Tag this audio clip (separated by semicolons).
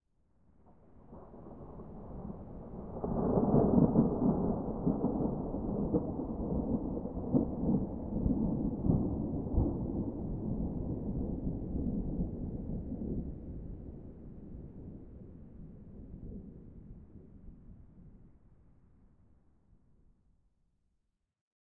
boom; distant; field-recording; lightning; rain; rumble; storm; thunder; thunderstorm; weather